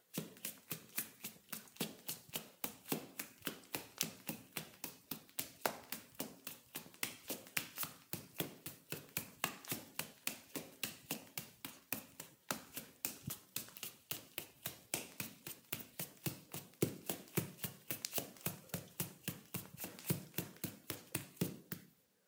01-12 Footsteps, Tile, Male Barefoot, Fast Pace
Barefoot running on tile